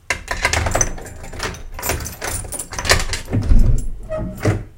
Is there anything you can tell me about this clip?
Unlocking Bedroom Door
unlock, door